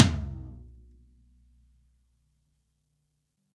Low Tom Of God Wet 013
kit, drum, tom, pack, set, realistic, drumset, low